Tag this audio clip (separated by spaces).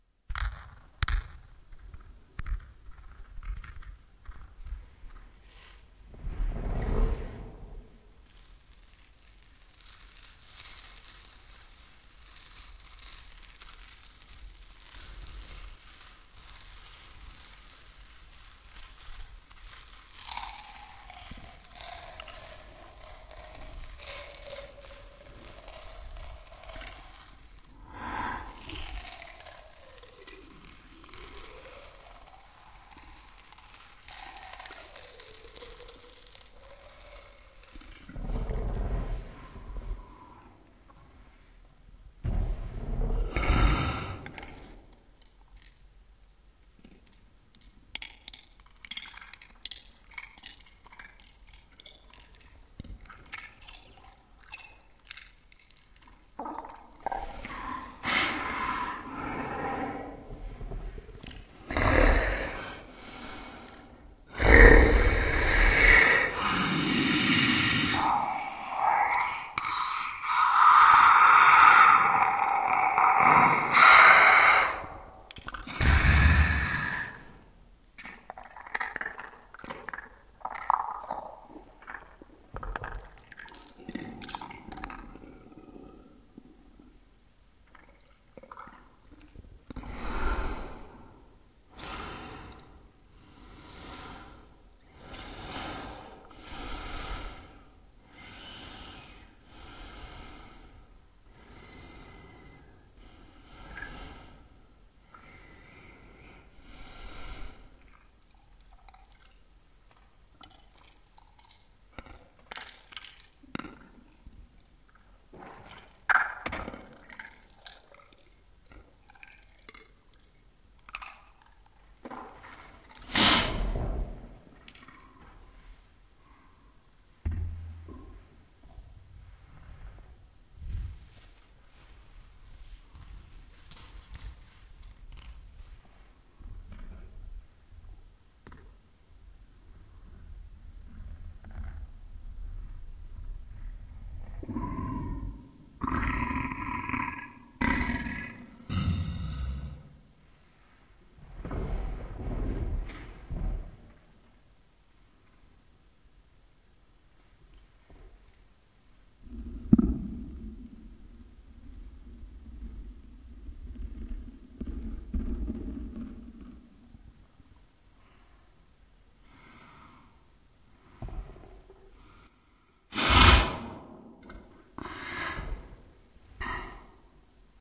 evil dripping echo creepy dark demon scary